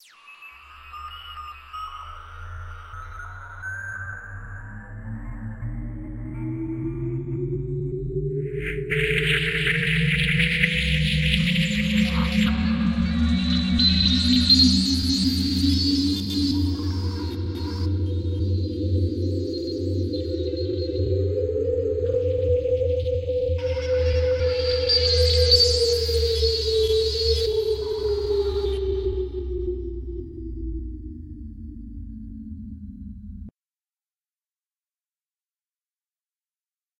ambience regarding coming and going of alien spaceships
alien, outerspace, sf, space-ships